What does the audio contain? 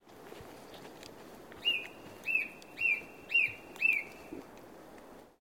Birds singing in oostduinen park in Scheveningen, The Netherlands. Recorded with a zoom H4n using a Sony ECM-678/9X Shotgun Microphone.
Evening- 08-03-2015